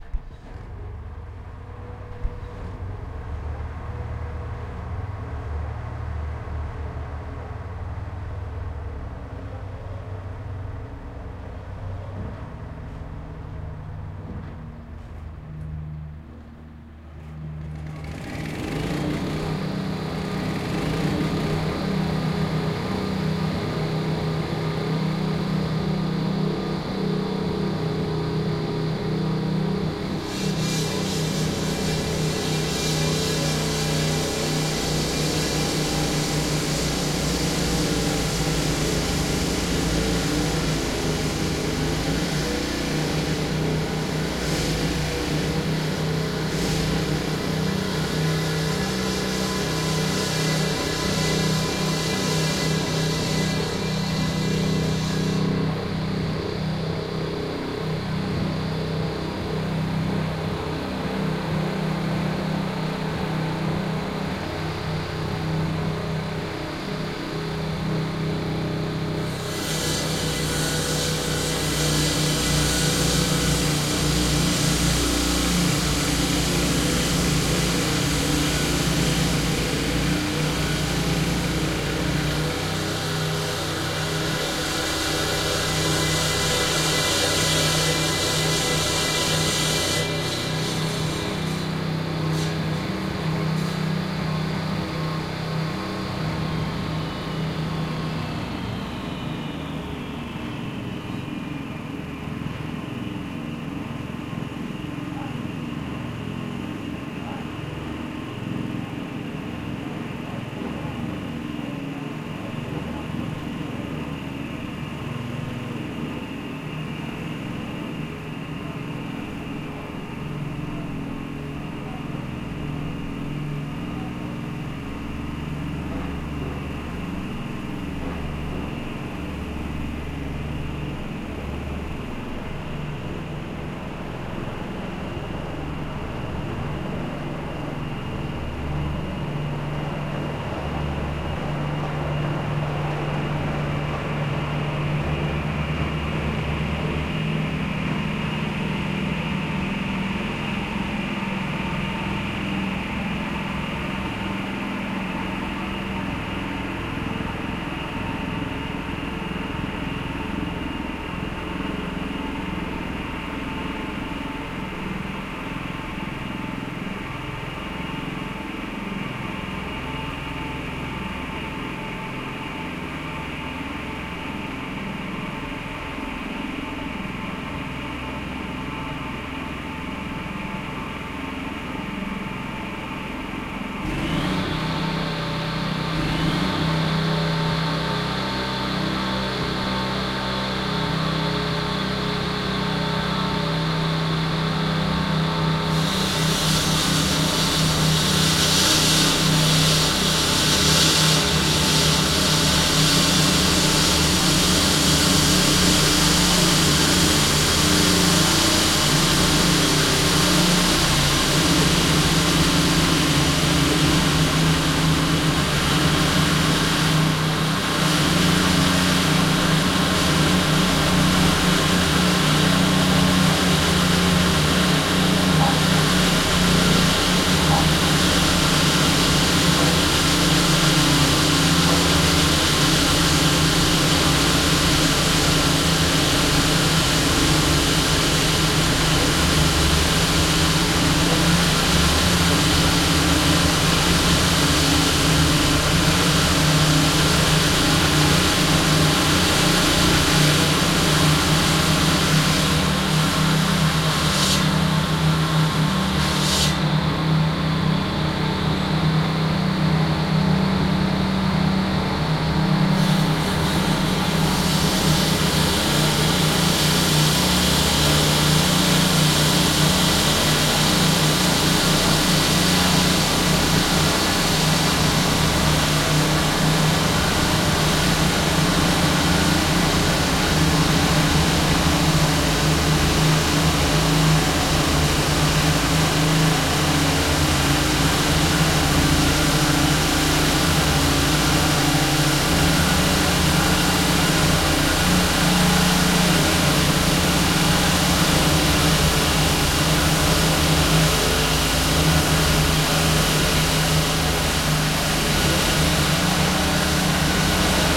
In the middle of a village is a waterconstruction going on. Workers open the street to get to a waterpipe. The long version is on my YT Channel world wide sound effects.
I recorded with a Zoom H1 last week